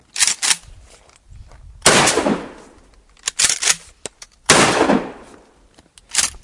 12gauge gunside
great sound of a shotgun.
target, gauge, fire, 12, impact, shotgun, report, gun